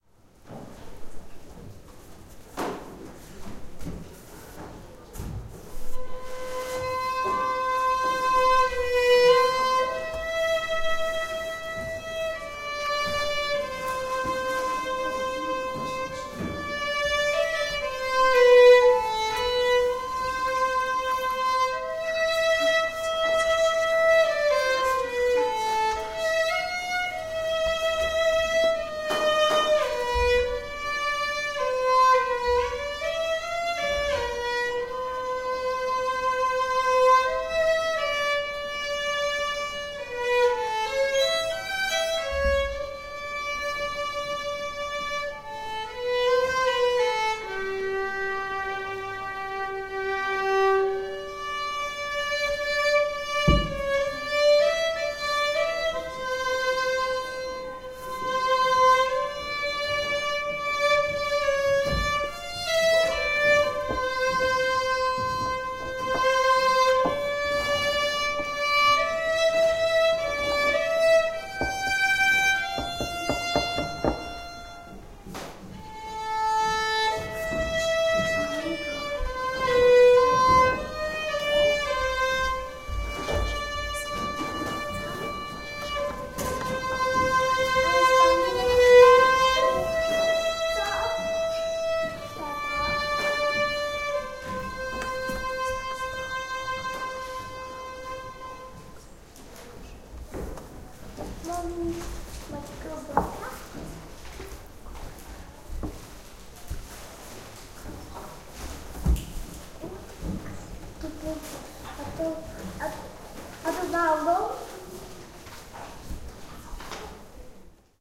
wdzydze church
"Ave Maria", played on the violin by a young student in an old wooden church in Wdzydze (Poland, Kashubia).
Registered on the TASCAM DR-100 with internal UNI microphones.
acoustic, church, DR-100, field-recording, Kashubia, music, Poland, TASCAM, Wdzydze